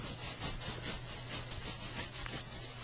head scratch
Scratching my head with my fingernails.
natural, behavior, scratching